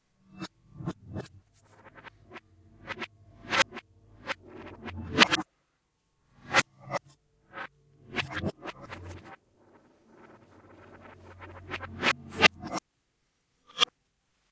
various mysterious noises made with wire iron pieces. Sennheiser MKH60 + MKH30 into Shure FP24, PCM M10 recorder
creepy, fantastic, ghostly, horror, metal, poltergeist, processed, scary